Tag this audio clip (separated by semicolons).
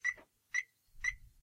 alarm; car; car-alarm